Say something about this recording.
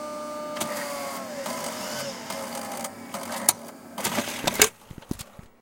DVW500 unthreading tape into the cassette, ejecting cassette.
The end sounds a bit rough as the mics were pushed out of the enclosure by the ejecting cassette... :-)
This sample is part of a set featuring the interior of a Sony DVW500 digital video tape recorder with a tape loaded and performing various playback operations.
Recorded with a pair of Soundman OKMII mics inserted into the unit via the cassette-slot.